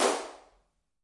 IR LivingRoom 5m Stereo
IR - Impulse Response of a Living Room.
Gear : Zoom H5.